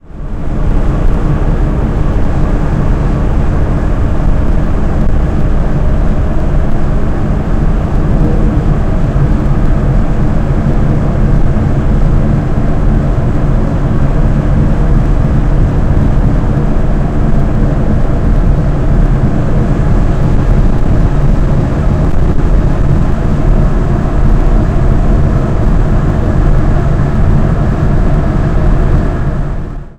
20070624 090550 drone3 boot bali
On a ferry to Bali. Java, Indonesia. drone 3/3
- Recorded with iPod with iTalk internal mic.